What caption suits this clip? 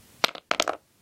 wood impact 13
A series of sounds made by dropping small pieces of wood.
block, crash, drop, hit, impact, wood